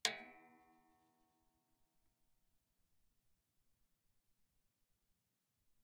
This sound was obtained by striking the string with a bamboo stick very close to the cotton string that attaches the gourd to the arc. No effects, no normalization.
berimbau, capoeira, percussion, string